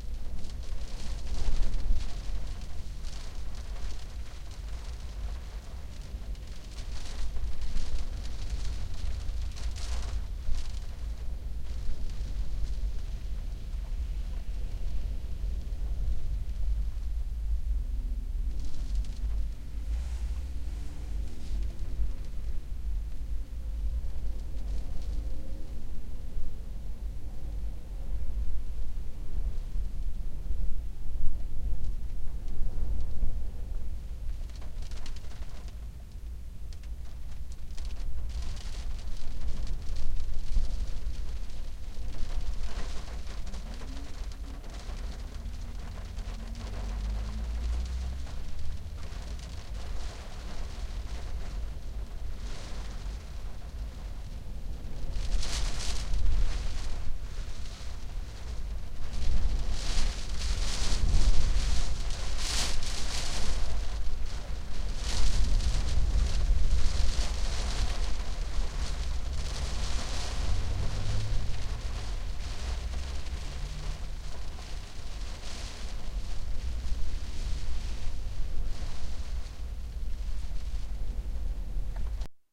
Inside car raining outside
Recorded from inside my car. I decided to make the best of it, while waiting for a new battery to show. The car just stopped so i pushed it to the nearest parking lot in the city of Varde, in Denmark. In this recording you can hear city traffic passing by, some wind and a lot of rain tapping on the windows and roof of the car.
Recorded with a TSM PR1 portable digital recorder, with external stereo microphones. Edited and in Audacity 1.3.5-beta.